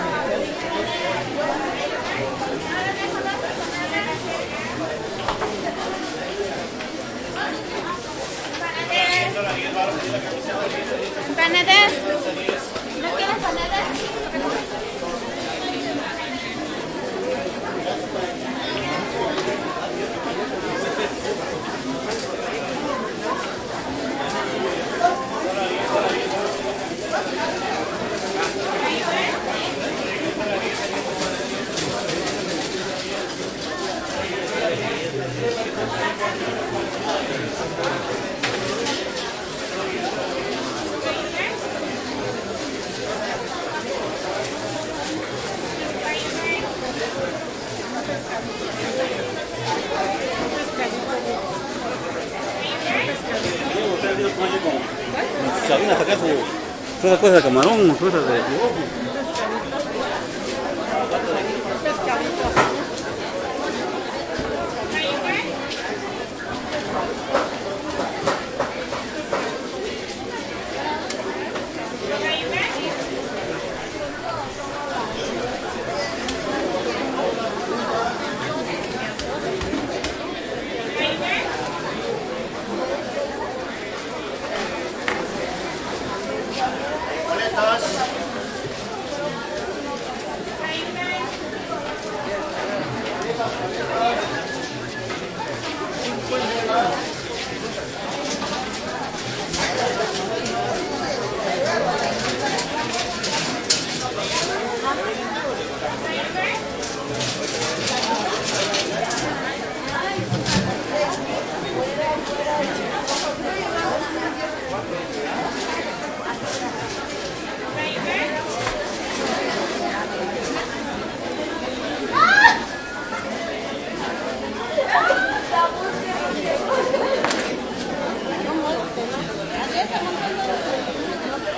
market int busy spanish voices1 Oaxaca, Mexico
busy, Oaxaca, spanish, market, voices, int, Mexico